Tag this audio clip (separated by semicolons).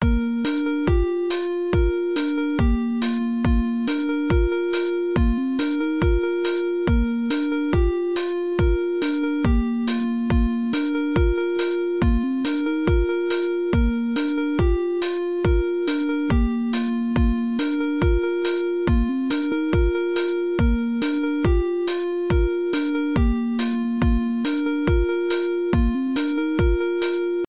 cheap; flash; game; games; gra; looping; music; muzyka; tune